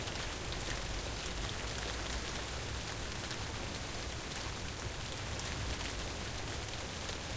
Rain-Light-Loopable
Light rain. Works well if looped.
Recorded to tape with a JVC M-201 microphone around mid 1990s.
Recording was done through my open window at home (in southwest Sweden) while this storm passed.
loop, rain, weather